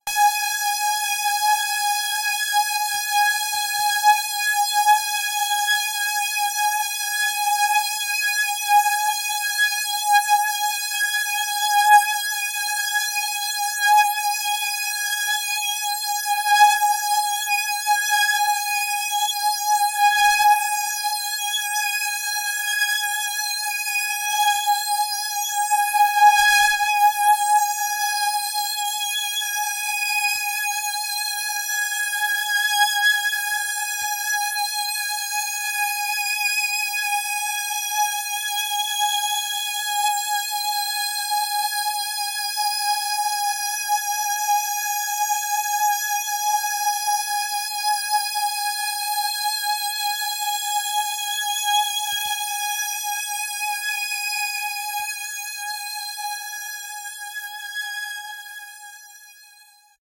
Q Saw LFO-ed filter sweep - G#5
This is a saw wave sound from my Q Rack hardware synth with a low frequency filter modulation imposed on it. Since the frequency of the LFO is quite low, I had to create long samples to get a bit more than one complete cycle of the LFO. The sound is on the key in the name of the file. It is part of the "Q multi 004: saw LFO-ed filter sweep" sample pack.
electronic, filtered, saw, waldorf, synth, multi-sample